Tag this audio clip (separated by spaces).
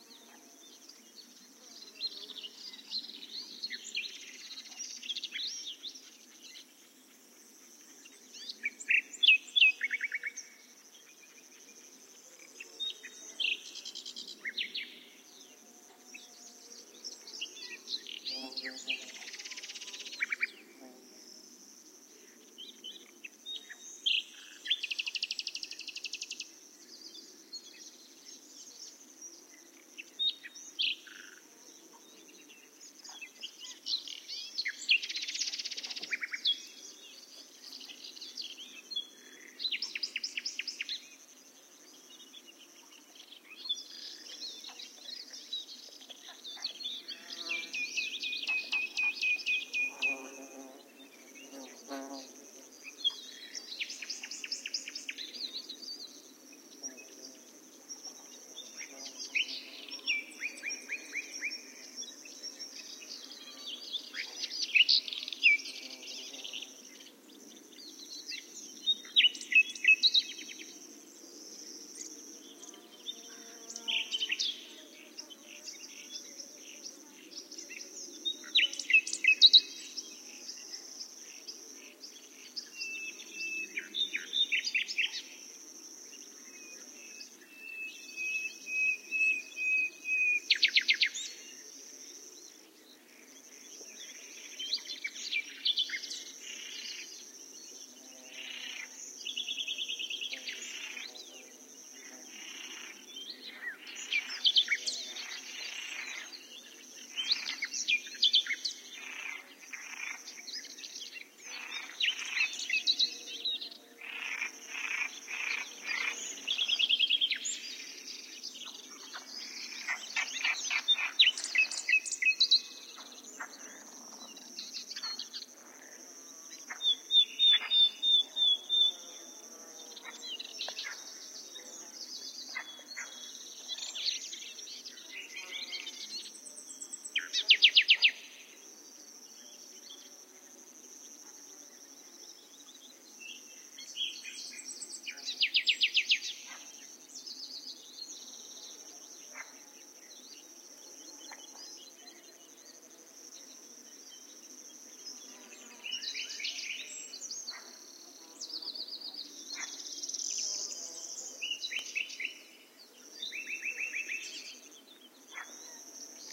birds nightingale south-spain